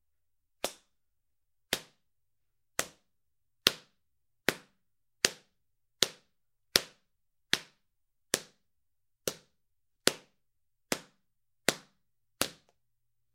Close Combat Whip Stick Switch Strike Flesh Multiple
Flexible switch hitting human flesh.
combat, fight, fighting, foley, fx, hit, sfx, sound, soundeffects, soundfx, studio, switch, whip